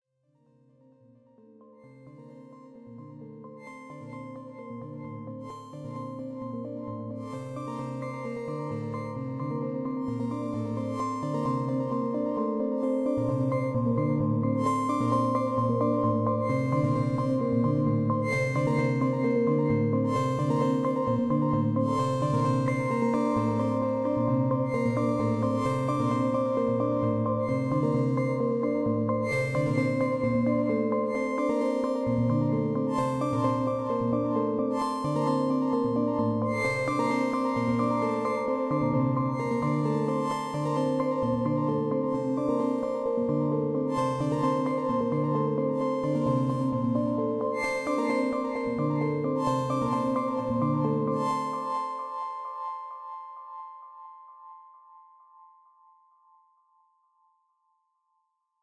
Synth arpegio delay
I´ve made this sequence with a virtual synth with my own parameters.
sequence, synth, phase, arpegio, progression, melody